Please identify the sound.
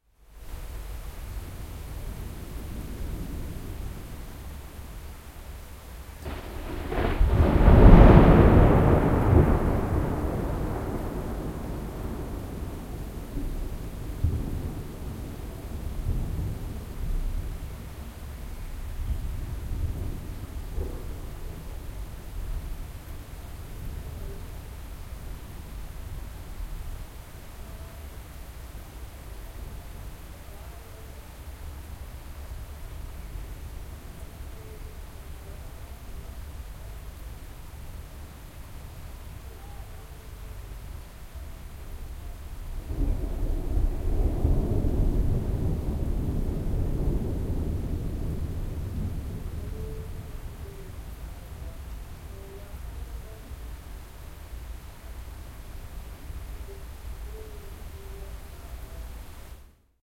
A song is playing on a neighbours radio, a severe rain is falling, a blackbird is singing and above all a thunder strikes and rolls. Recorded in the evening of the 8th of June 2007 in Amsterdam with an Edirol R09 in the hammock on my balcony.
field-recording, nature, noise, rain, street-noise, thunder